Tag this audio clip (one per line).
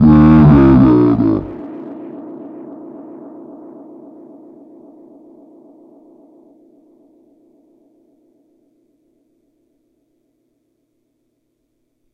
bass
droney
low
voice